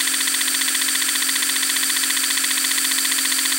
Audio of a small motor from the "Precision DC Motor Drive" of a "Celestron" telescope. Seamlessly loopable.
An example of how you might credit is by putting this in the description/credits:
The sound was created in Cubase on 24th January 2019.